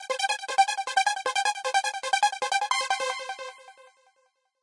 simple trance melody
melody, synth